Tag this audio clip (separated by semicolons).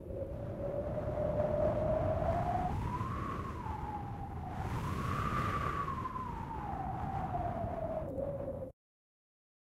Wind; Storm; Arctic; Windy; Breeze